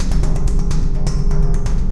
Another rhythmic loop made from a metallic sound and a variation of 126 Numerology Metal 3 A